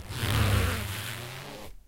zoom H4.
pulling the yoga mat with my hand and letting it slip.
squeak, yoga, mat